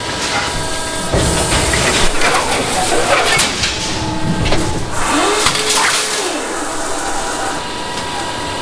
die cut
die, industrial, machine, factory, field-recording, metal, processing
field-recording, machine